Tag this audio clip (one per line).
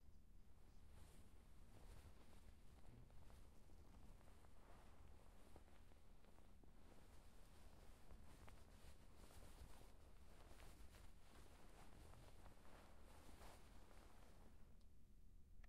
Bed
Brush
Sheet